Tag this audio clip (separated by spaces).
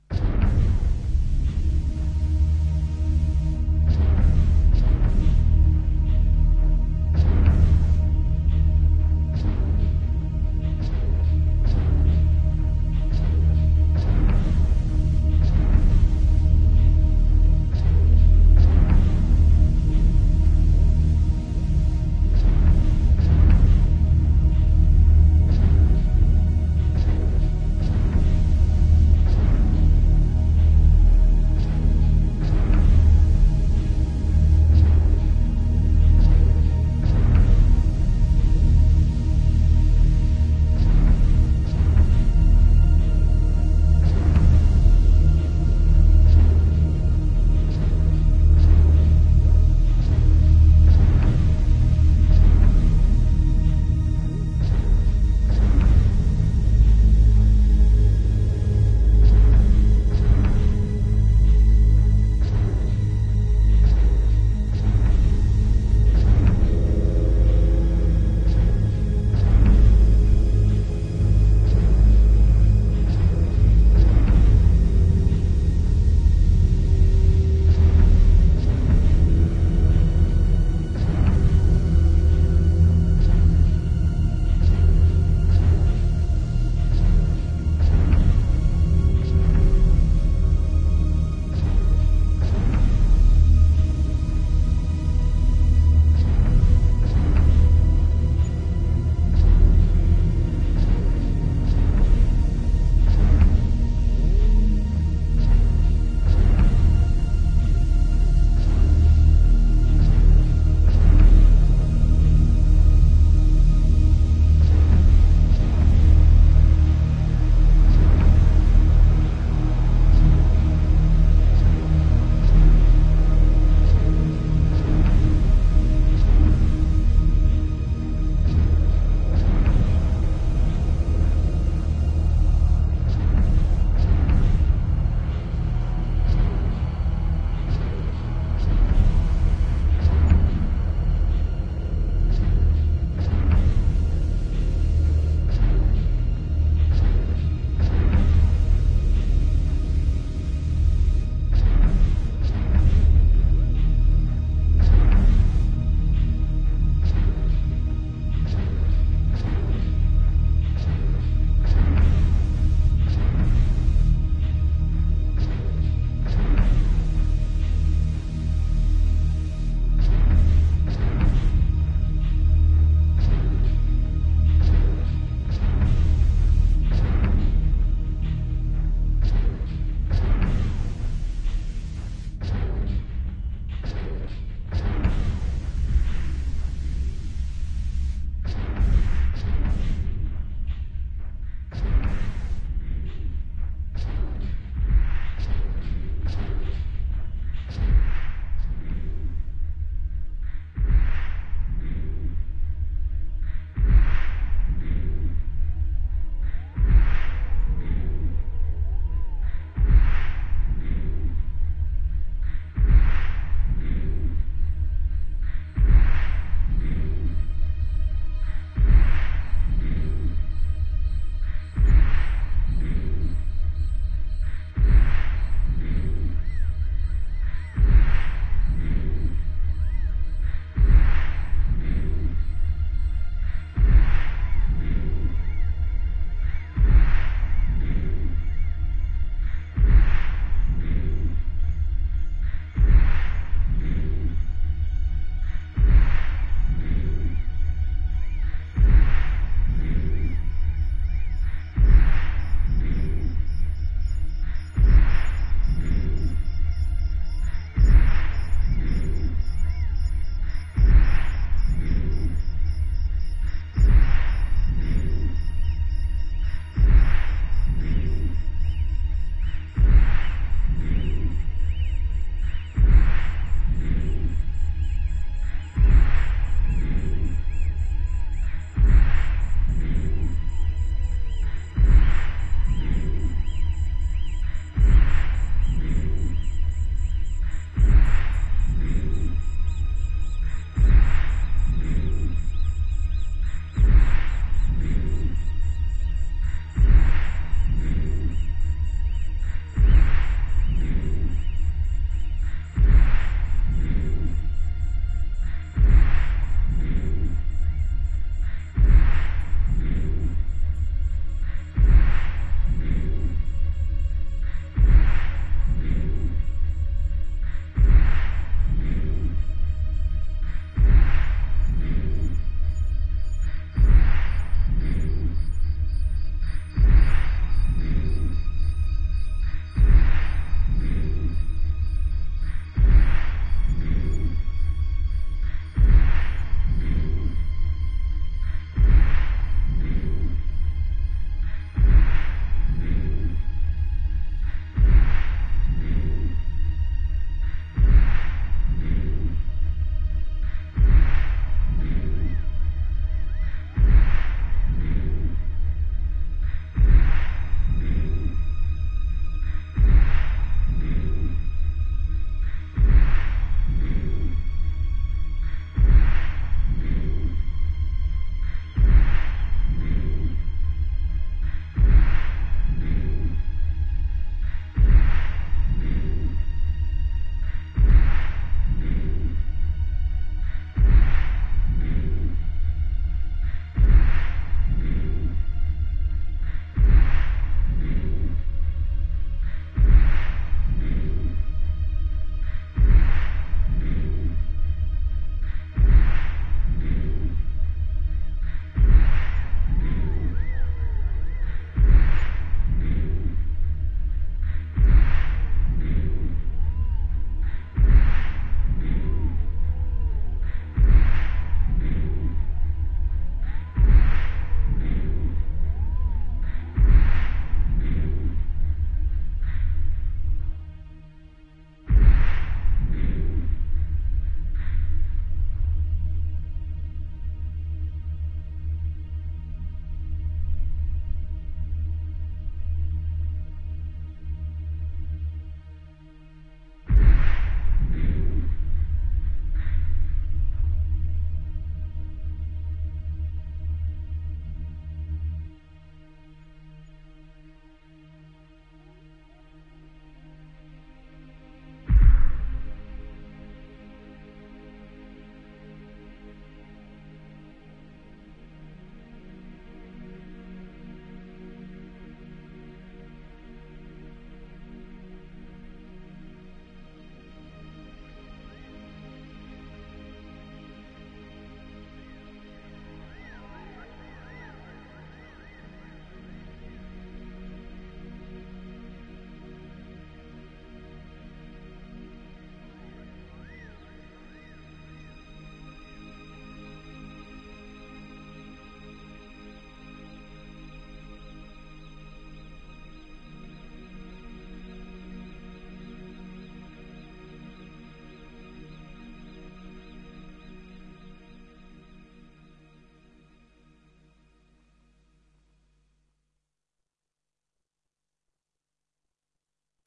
Empire Galactic Jedi Knights synthesizer